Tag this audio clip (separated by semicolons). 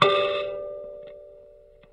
tone; piezo; blip; electric; tines; bleep; amp; thumb-piano; bloop; kalimba; contact-mic; mbira